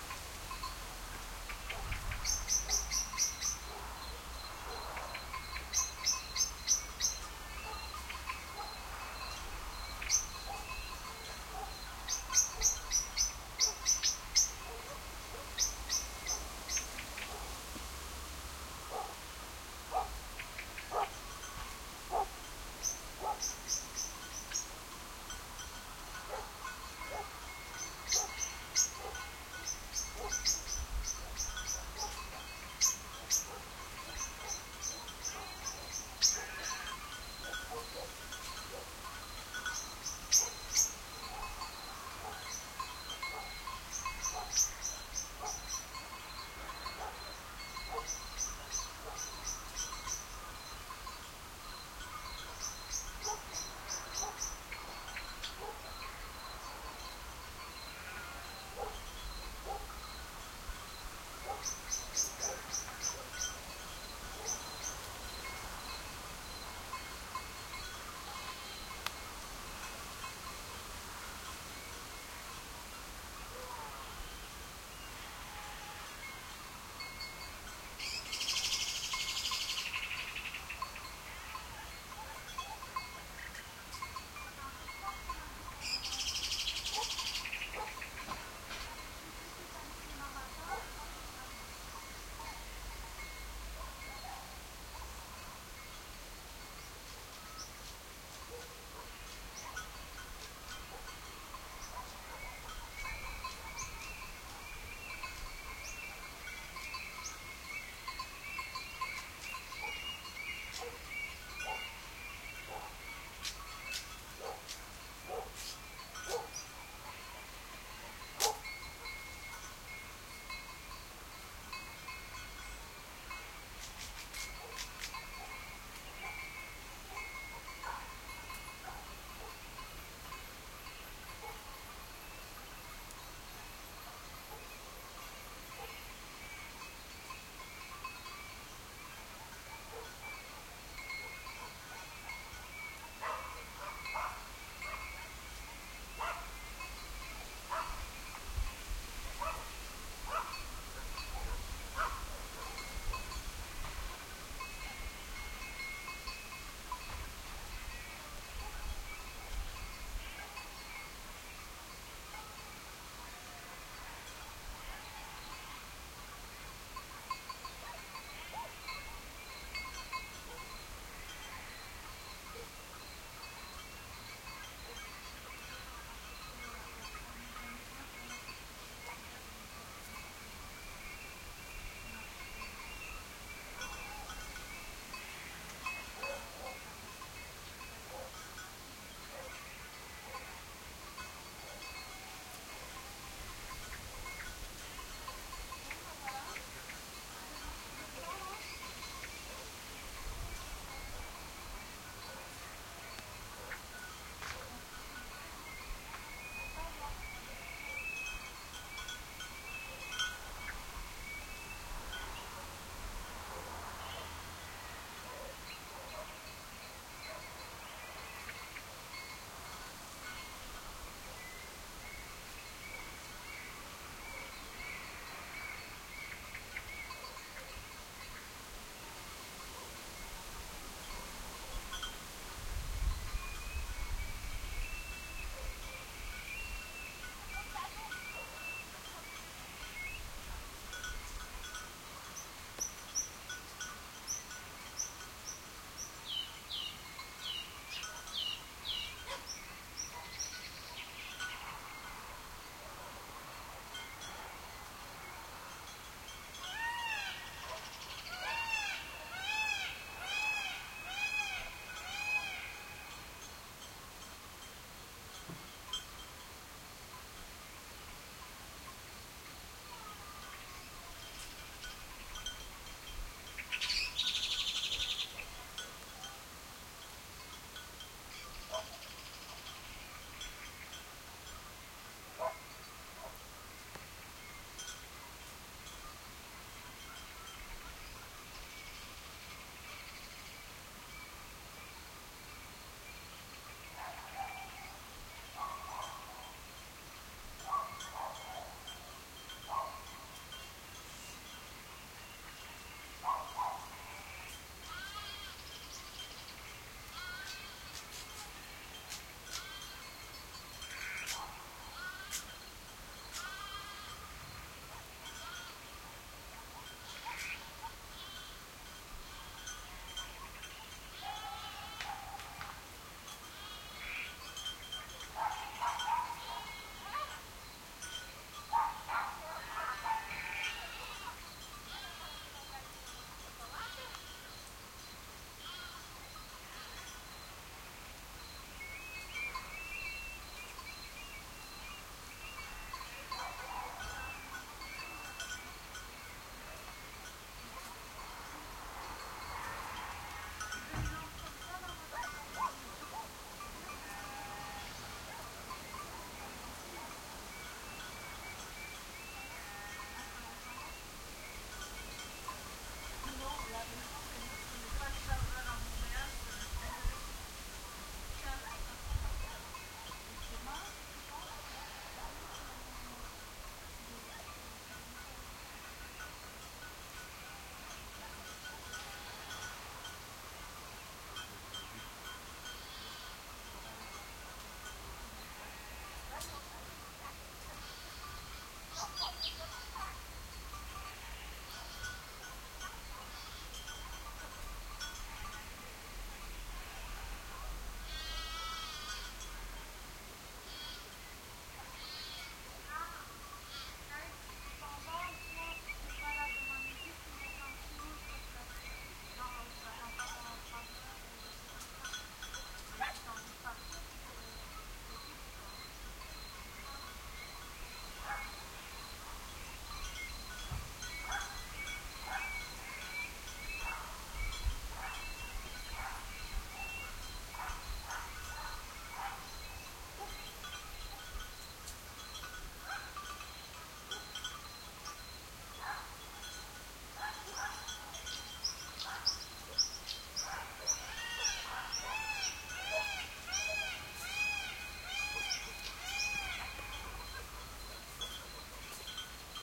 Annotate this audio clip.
country day birds crickets distant dogs bark sheep bells tinkle and leafy wind through trees +funky echo bird end Mallorca, Spain